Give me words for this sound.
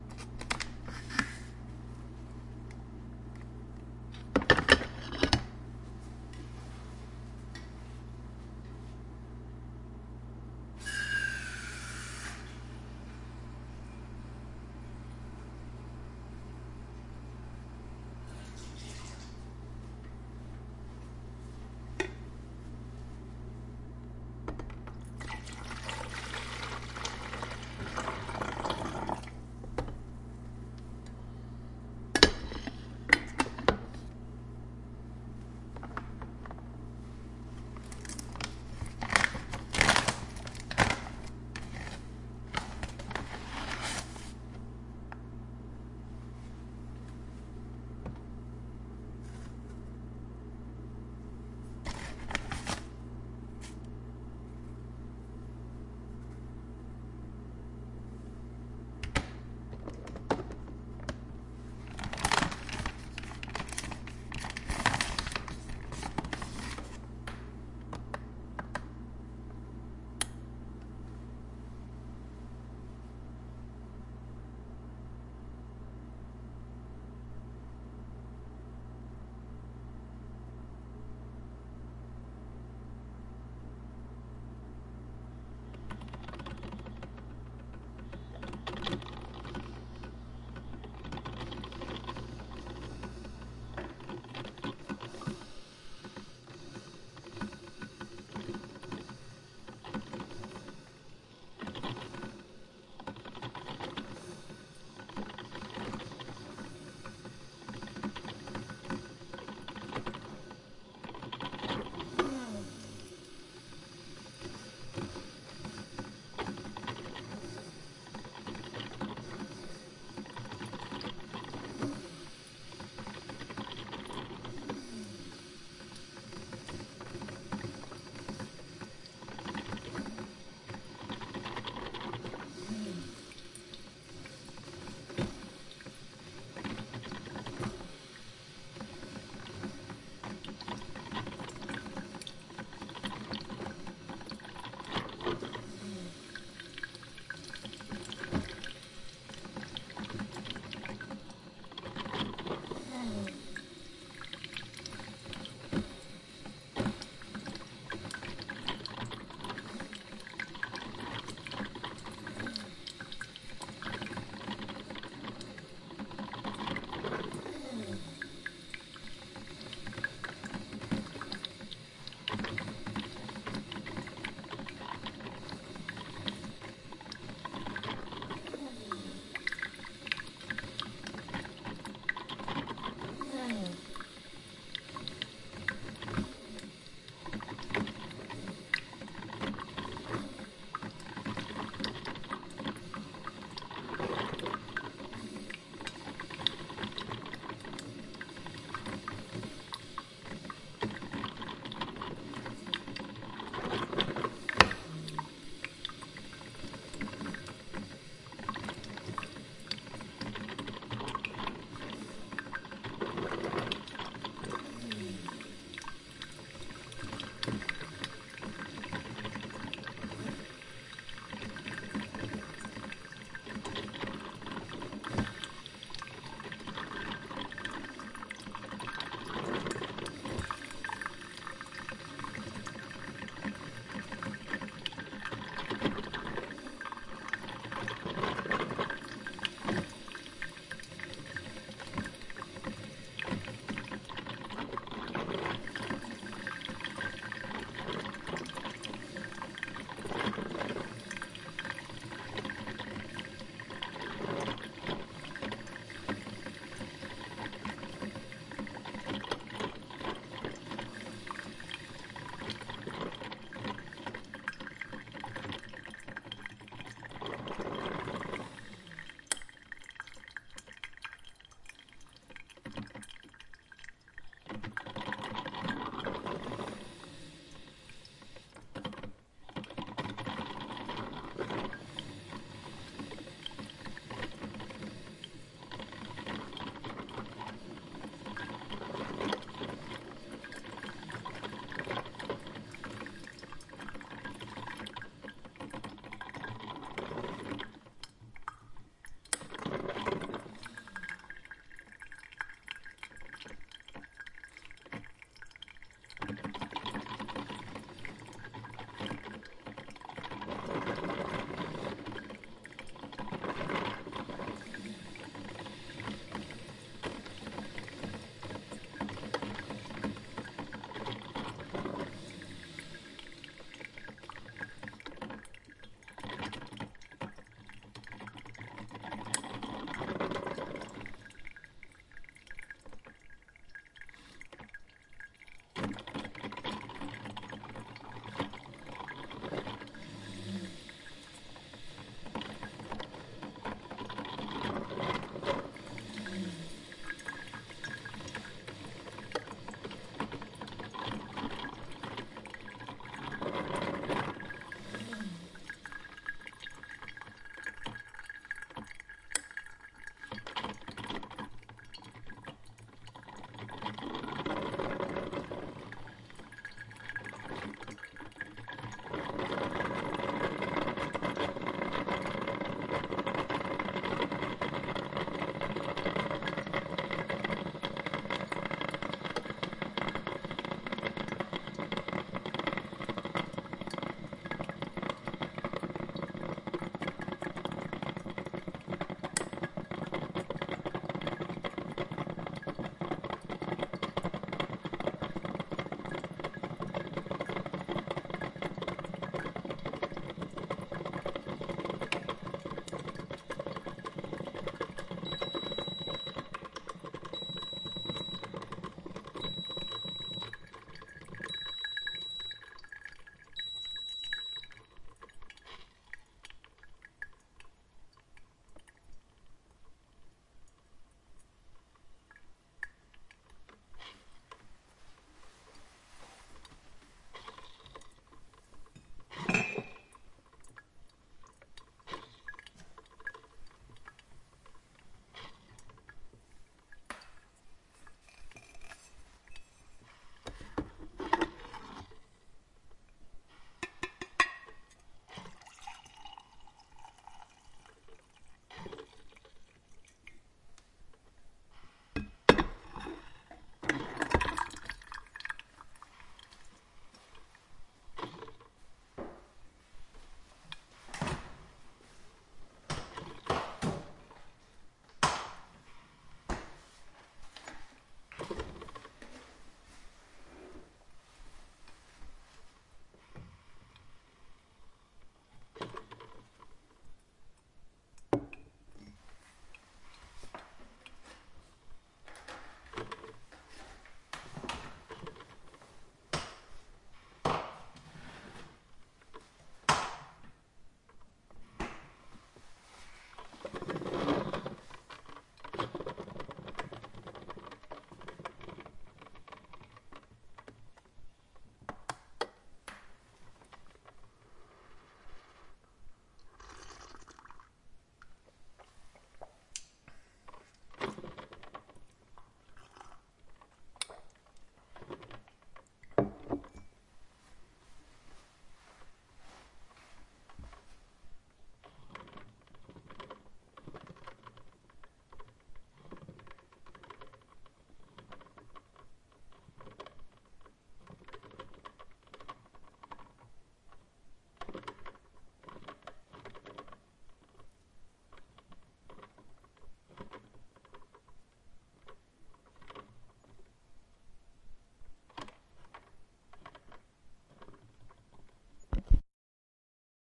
leveled and normalized and DC removed in reaper with various vst's.